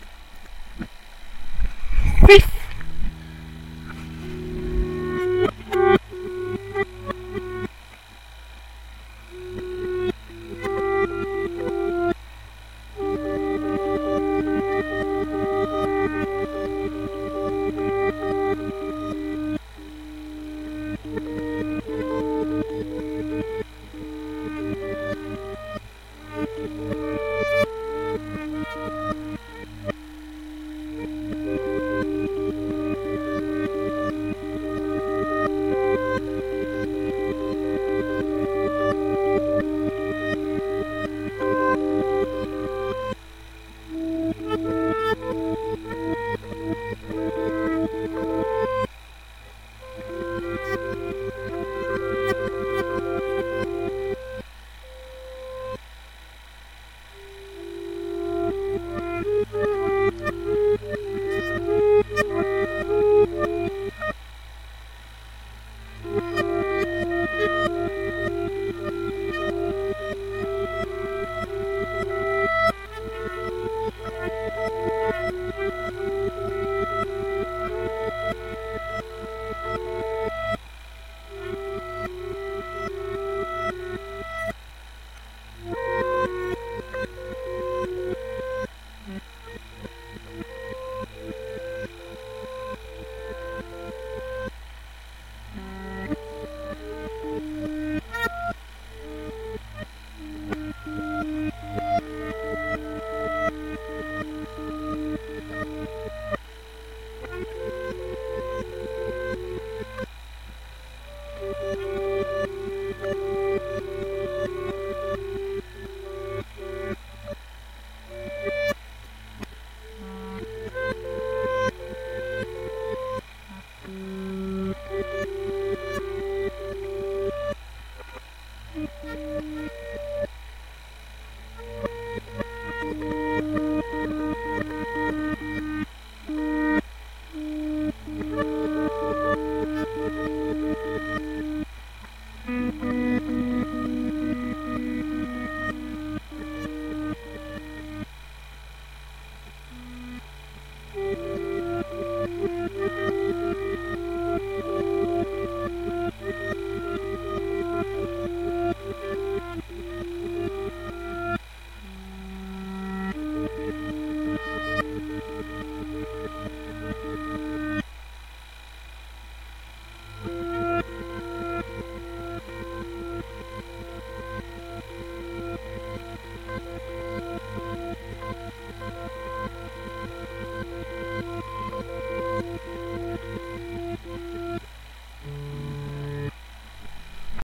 guitar loop indian invert
Short guitar melody inverted.. sounds like little breathing..
guitar, invert, indian